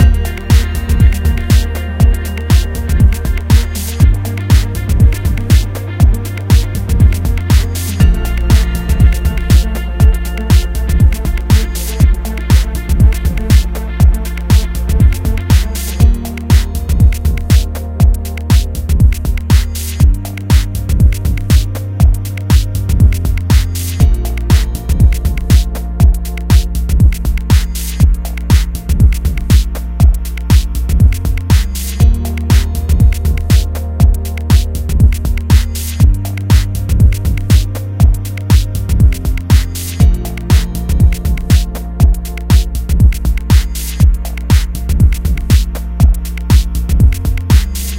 Collide (loopable)
A collection of loop-able sounds from MSFX’s sound pack, “Cassette ‘One’”.
These sounds were sampled, recorded and mastered through the digital audio workstation (DAW), ‘Logic Pro X’. This pack is a collection of loop-able sounds recorded and compiled over many years. Sampling equipment was a ‘HTC Desire’ (phone).
Thank you.
msfx, drop, rave, sad, synth, house, dance, beat, loopable, sound, indie, happy, 80s, glitch-hop, club, 90s, trance, electronic, nostalgic, ambient, dub-step, music, loop, techno, bounce, electro, minimal, drum-loop, drum-kit